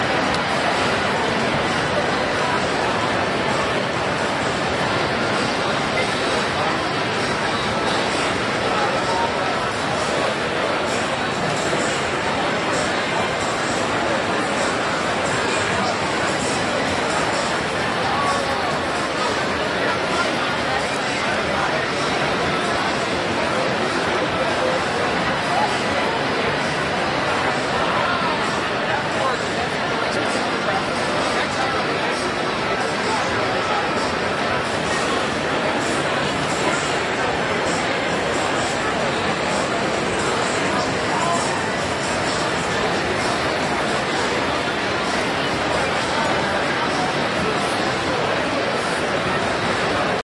- convention crowd noise
This is convention chatter noise I recorded from Dragon*Con 2011 in Atlanta, GA.
background-noise, crowd